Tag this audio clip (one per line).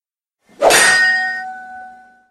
sword
sword-hit